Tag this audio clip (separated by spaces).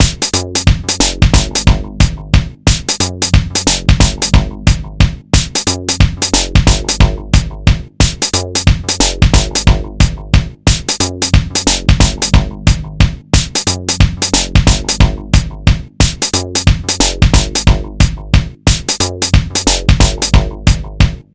techno electronic synth sample